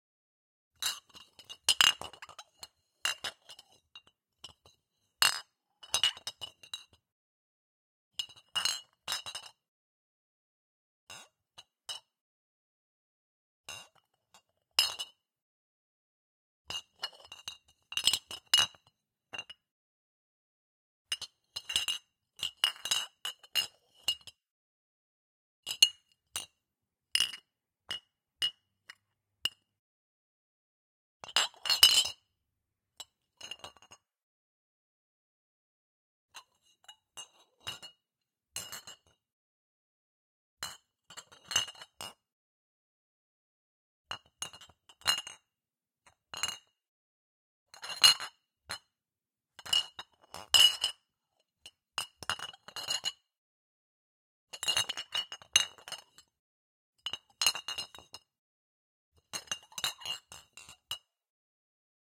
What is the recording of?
Glass Bottles 02
Small pile of glass bottles clinked together.
CAD E100S > Marantz PMD661
clink, glass-bottle, glass-bottles